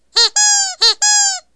This is a sample I did a while back when I was looking for a new default error sound for my computer. Taken from a old vinyl toy dog, cat and bear
cat, dog, vintage, bear, skweek, toy, squeak, squeeck